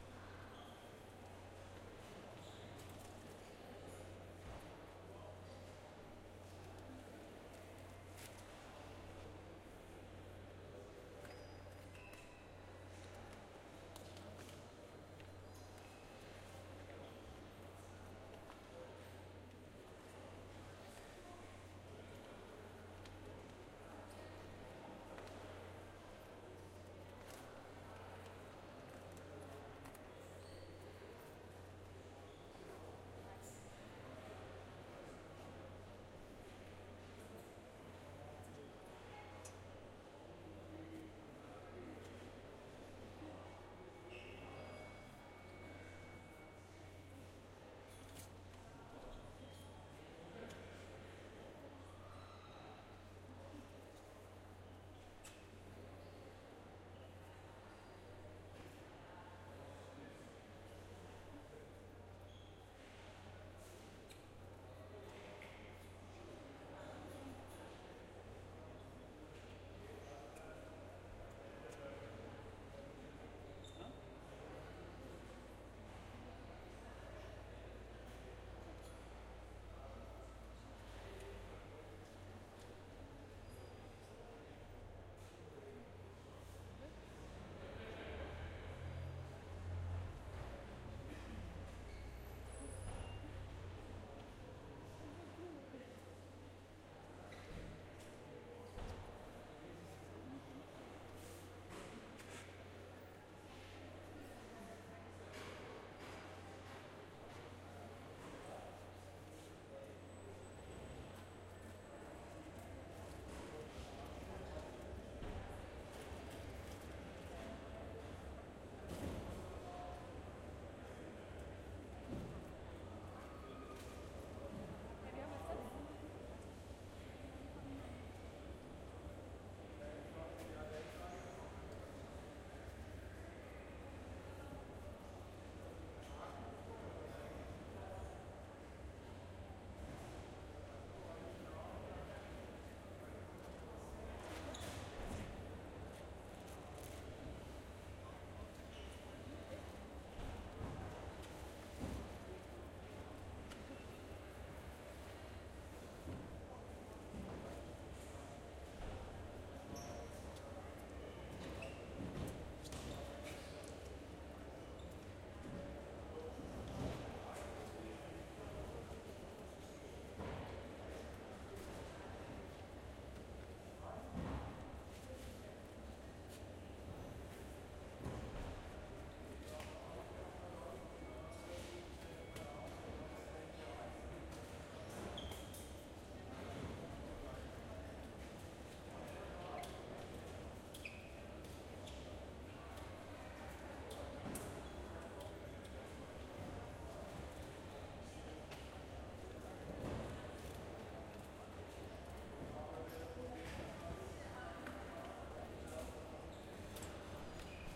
Ambience INT airport baggage claim conveyor band people chattering (frankfurt hahn)
Field Recording done with my Zoom H4n with its internal mics.
Created in 2017.